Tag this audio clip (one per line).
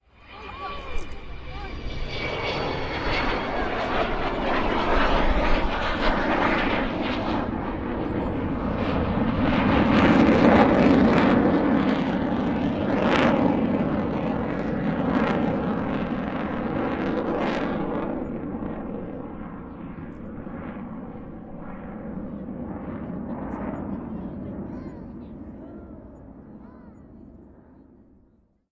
over
plane
aeroplane
flying
fighter
jet
fly
fighter-jet
Vulcan